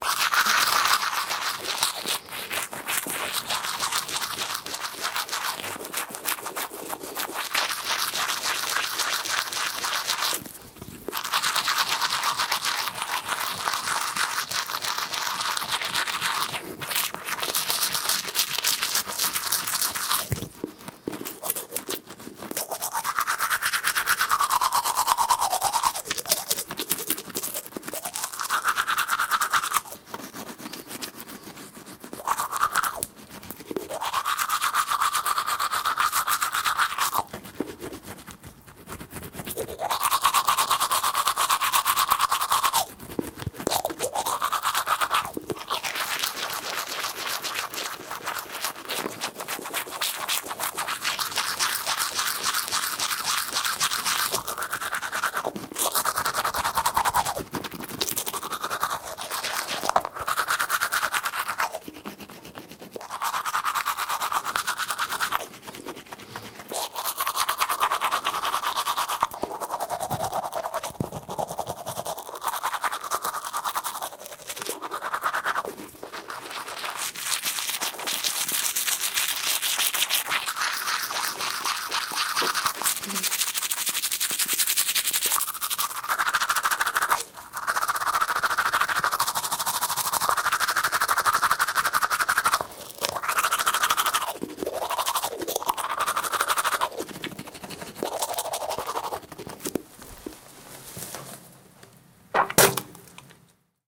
Tested out my new Zoom F8 with a Slate Digital ML-2 Cardiod Smallcondenser-Mic. I decided to record different sounds in my Bathroom. The Room is really small and not good sounding but in the end i really like the results. Cheers Julius